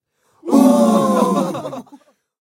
Group of men saying "uhhh"